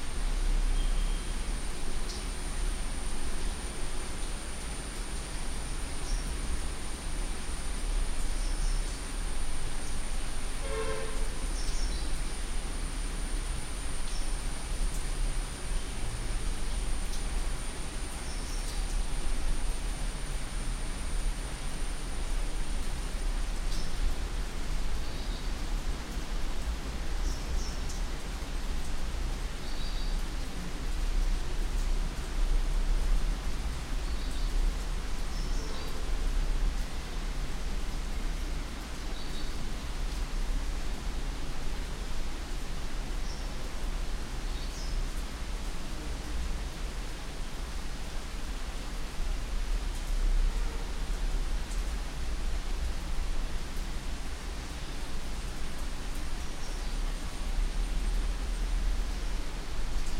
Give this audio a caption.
Semi-urban ambience 1
Semi-urban ambience recorded using Samson Go Mic. Sounds of wind, drizzle (light rain), vehicle horn, birds chirping and woodcutting can be heard in distance.
Recorded by Joseph
ambience, birds-chirping, drizzle, field-recording, wind